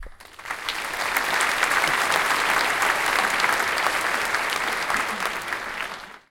Audiance applauding in concert hall.
Field recording using Zoom H1 recorder.
Location: De Doelen theatre Rotterdam Netherlands